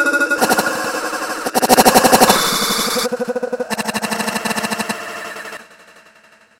weirdsci-finoise
A weird, Sci-Fi based noise.
Tags:
edited sci-fi science scifi weird space fiction futuristic sci fi science-fiction machine electromechanics alien future horror another-planet life planet undiscovered mystery
alien, another-planet, edited, electromechanics, fi, fiction, future, futuristic, horror, life, machine, mystery, planet, sci, science, science-fiction, sci-fi, scifi, space, undiscovered, weird